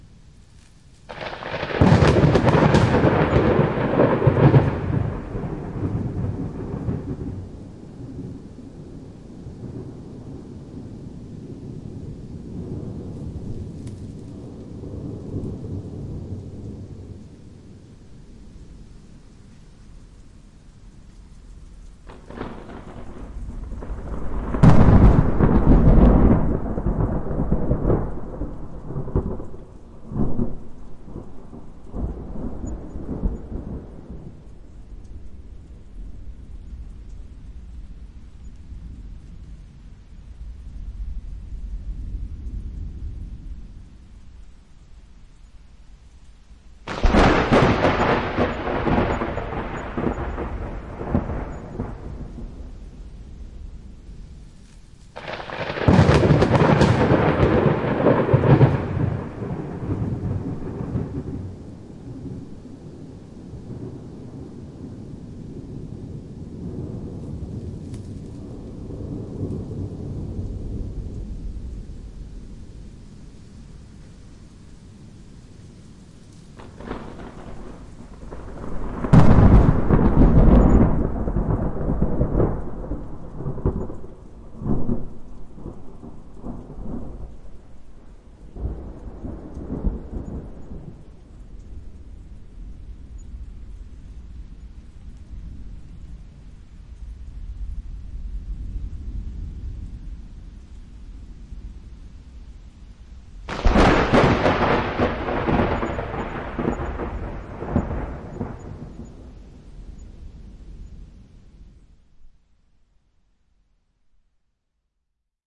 Thunderclap mix with rain (short)
High Quality thunderclap mix mastered with sounds from:
Lightning, Mix, Quality, Rain, Recording, Rumble, Thunder, Thunderclap